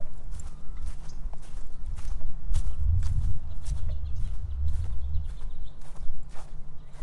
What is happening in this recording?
Walking Grass
grass, walk, footsteps